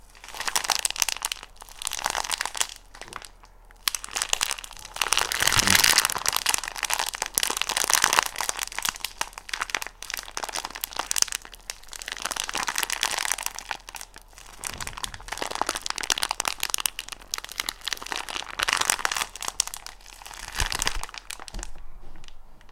Bones crunch human bone 4
the sound of someone's bones getting chrunch